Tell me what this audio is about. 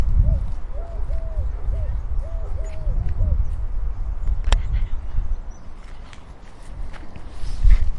Tortola Turca- Ariadna i Nerea
La Tortola Turca estaba en una branca d'un arbre mentres cantaba.
bird, collared-dove, deltasona, El-Prat, field-recording, park, Tortola-turca